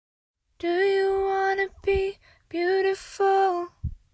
'Do you wanna be beautiful' vocal sample (2)
A female voice singing the lyrics 'Do you wanna be beautiful'. There are three takes of this clip, as per request.
female, girl, lyrics, request, sing, singing, vocal, voice